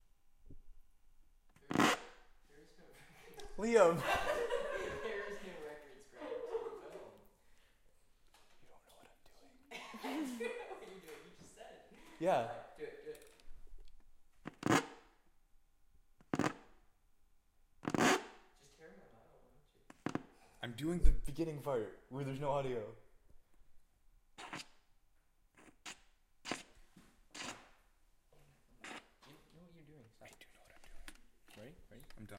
A Vinyl record scratch with added banter.
Banter, Music, Record, Scratch, Vinyl